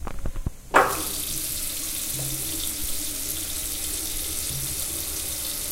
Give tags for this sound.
bath campus-upf hands liquid tap toilet UPF-CS12 water

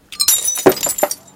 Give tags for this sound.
crack crash glasses smash

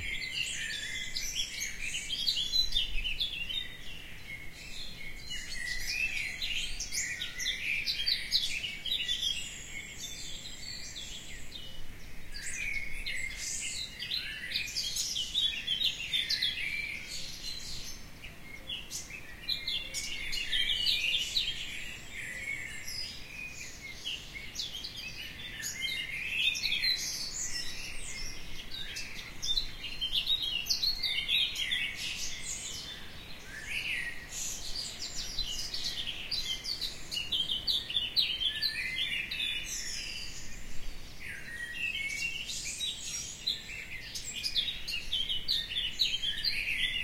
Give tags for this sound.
natur vogel field-recording forest gezwitscher twittering nature birds Vogelgesang morning gel birdsong twitter bird v Morgen

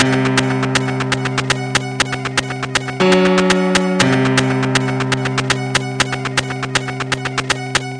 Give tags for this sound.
broken lo-fi loop motion

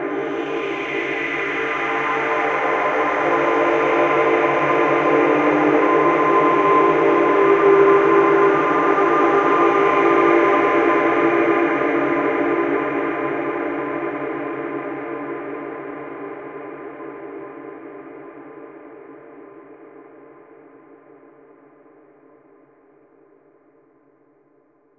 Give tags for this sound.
space drone ambient deep soundscape

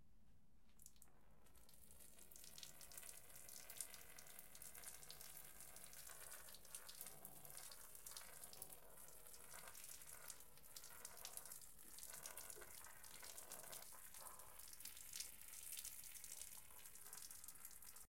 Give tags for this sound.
urination pee urinate

